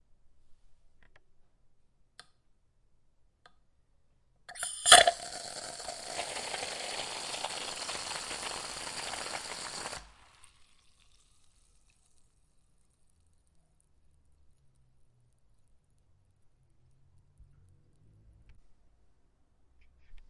Sirviendo soda en un vaso de vidrio.